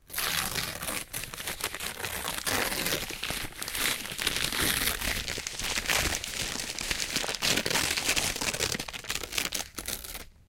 rustle.paper Tear 3
recordings of various rustling sounds with a stereo Audio Technica 853A
noise, rustle, tear, paper, scratch, rip, cruble